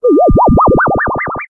Created as:
amp(t)=sin(kt^2)
some constant k